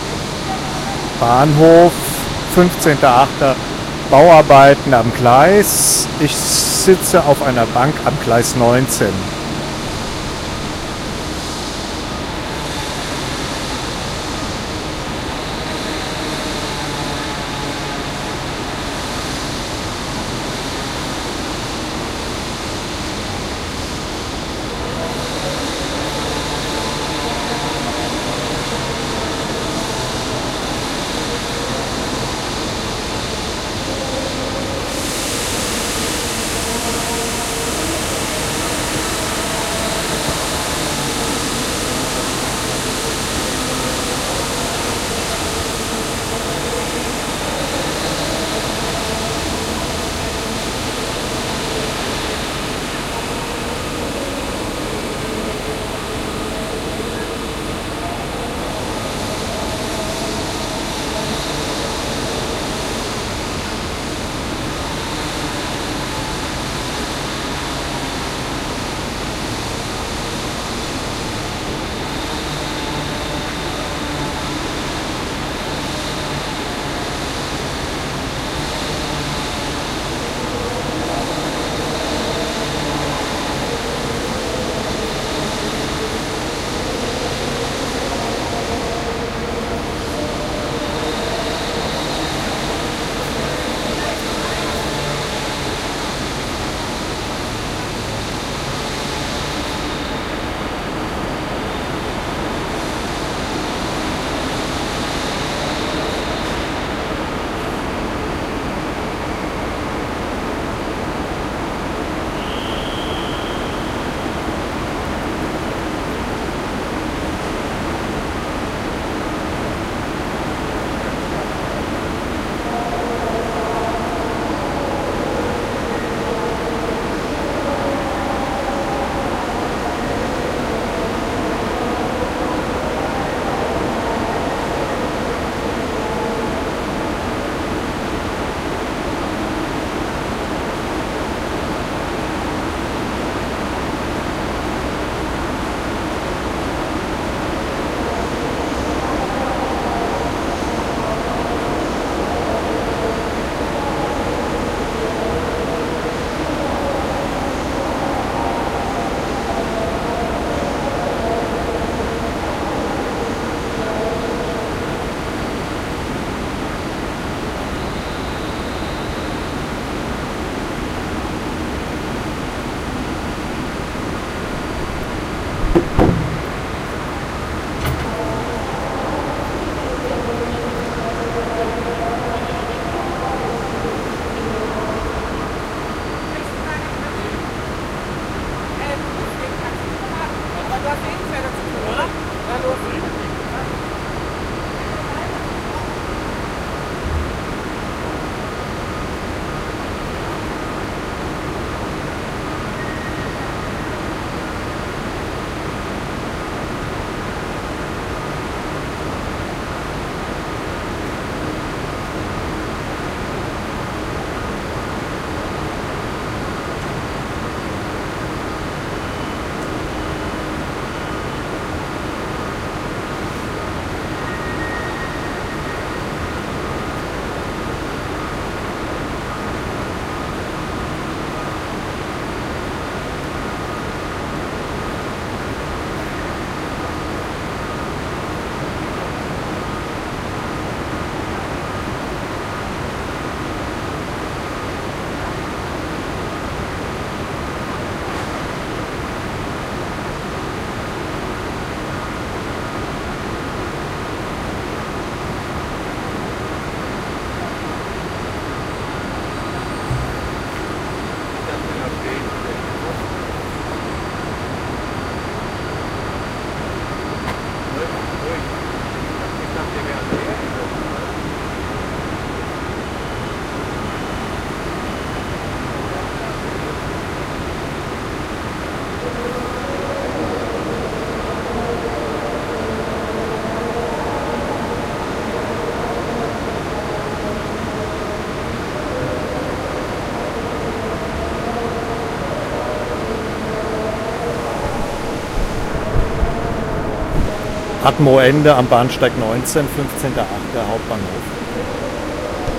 Frankfurt/Main, Germany, Main Station
Main Station, Frankfurt/M, Germany, Atmo in hall, Air Hammer
Air, distant, Frankfurt, Germany, Hammer, M, Machines, Main, Noise, Space, Station